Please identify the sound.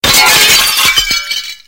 Glass Breaking
Sound recorded in my kitchen
field-recording, brakes, glass